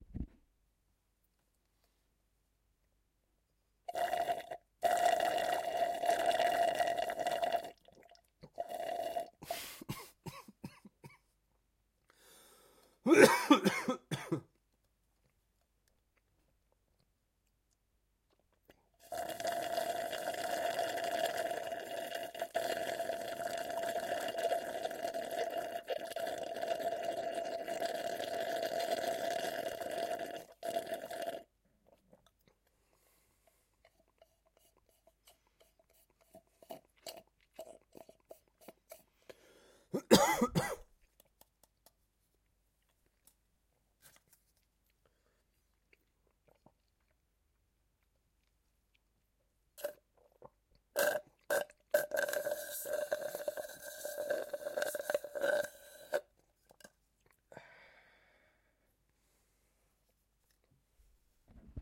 Sucking on straw
Draining the last bit of drink out of a glass with a straw. Neutral internal background. Recorded with Zoom H1. Another sound effect from the makers of Release The Clowns Sketch Comedy Podcast.
field-recording, water